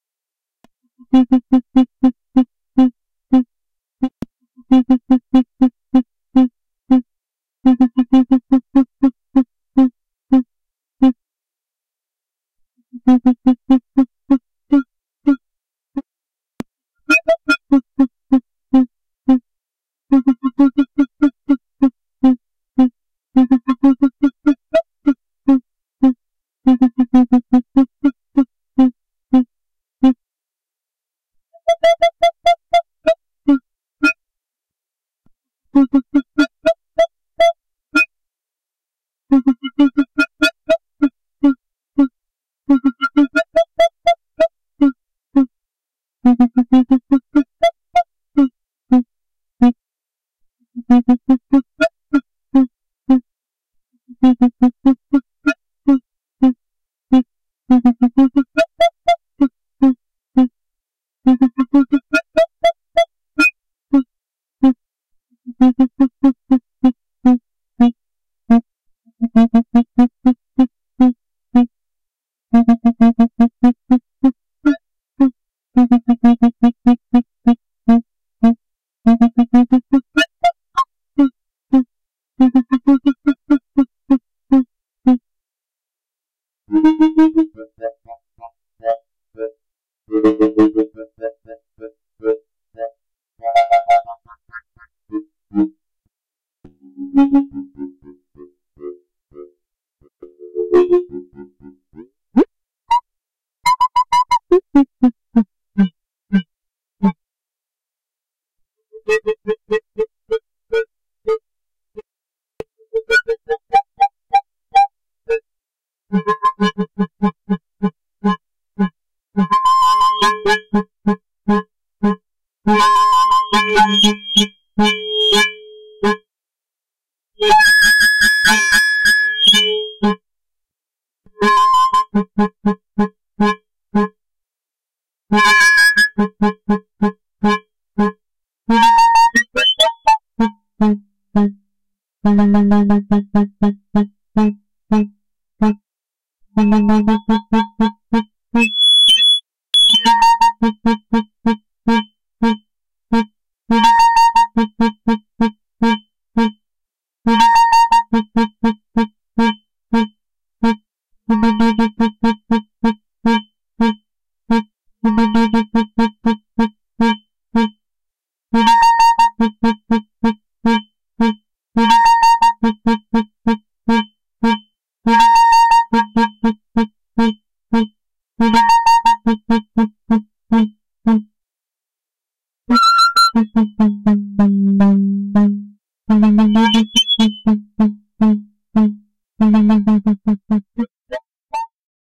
Third Bird Synth 04
Alien birds warbling.